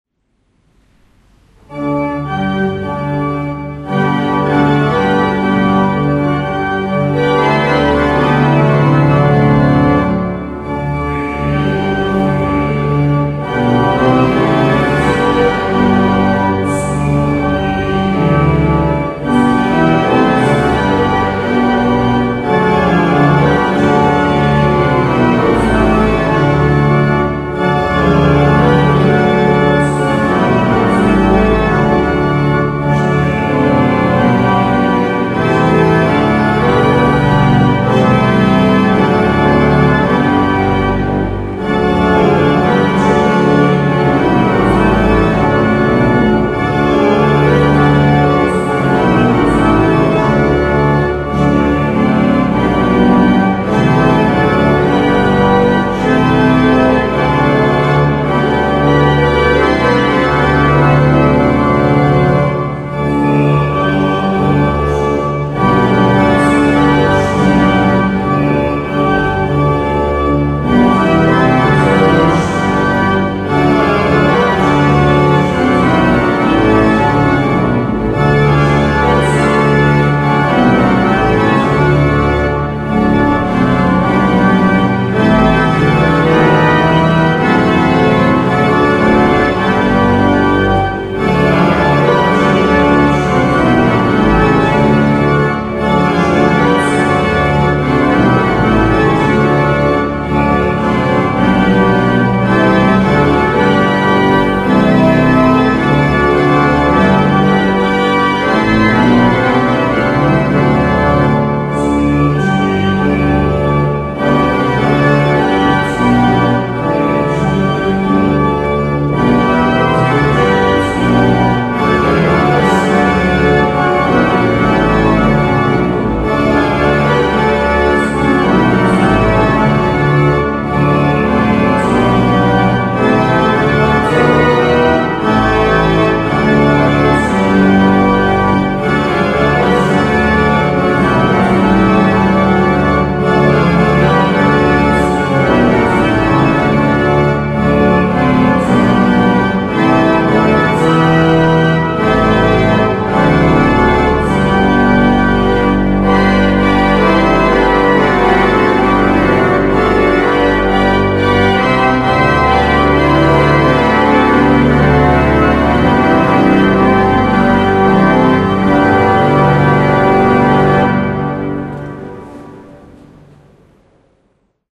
organ tutti
tryumfy króla niebieskiego
church, forte, fortissimo, organ, orgel, tutti